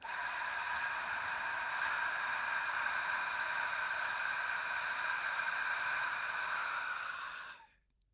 Recording of me breathing out. Recorded using a Sennheiser MKH416 and a Sound Devices 552.
Breath, Breathing, Expel, Human, Out